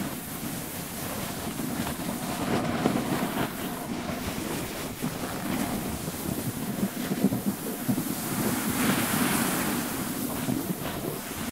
Snowboard Slide Loop Mono 04
Snowboard - Loop.
Other Snowboard loops:
Gear: Tascam DR-05.
field-recording, glide, gliding, ice, loop, slide, sliding, snow, snowboard, winter, winter-sport